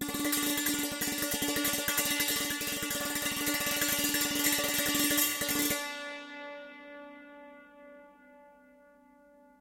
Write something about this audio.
sant-roll-D4
recordings of an indian santoor, especially rolls plaid on single notes; pitch is indicated in file name, recorded using multiple K&K; contact microphones
roll, pitched, acoustic, percussion, santoor